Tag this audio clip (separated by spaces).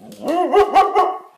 woof big dog husky shepperd